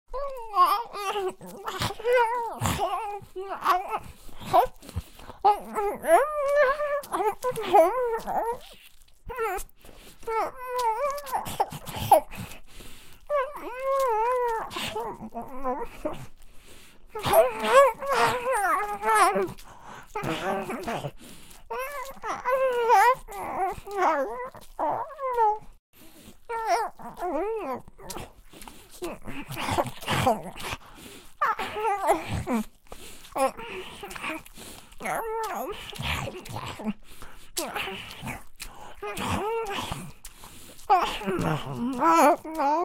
small creature eats meat or something full
small creature eats meat or something will full mouth, eating sounds, food sounds, munch, chomp, munching
eat, food, munch